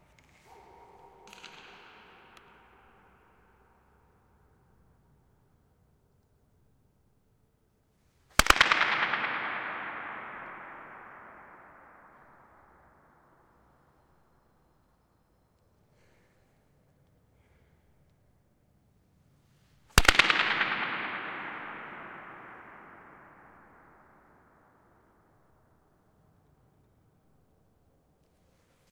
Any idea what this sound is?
teufelsberg dome 3
recording made inside a disused radar dome at a derelict cold-war radio station in berlin, germany.
various knocks, claps and hits were made in the space to create interesting echo and reverb effects.
trimmed sections of this recording make interesting source files for convolution reverbs.
bang, clap, echo, field-recording, hall, reverb, space